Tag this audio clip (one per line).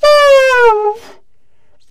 tenor-sax
saxophone
woodwind
jazz
sax
vst
sampled-instruments